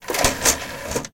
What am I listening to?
Computer - Desktop - CD - Open tray
Open CD tray on desktop computer.
open, cd-tray, computer, cd-rom, pc, cdrom, cd, desktop, cd-drive